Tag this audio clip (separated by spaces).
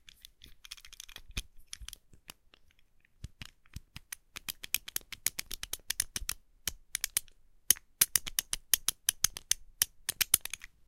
closing picking opening key pick picks unlocking lock close open unlock padlock gate security keys locking door